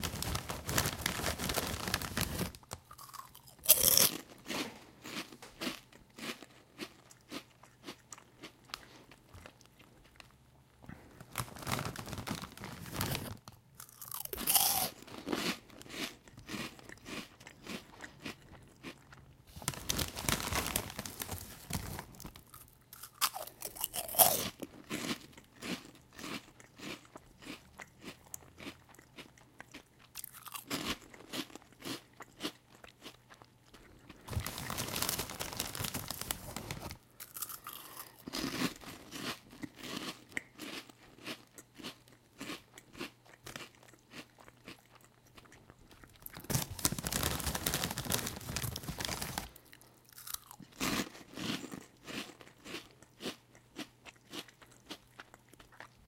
Eating chips, munching, smacking, bag rustle
Eating chips and making typical sounds (munching, swallowing, bag rustle)
Recorded with a Zoom H1 (internal mics)
chips, eat, food, mouth, munch, rustle, swallow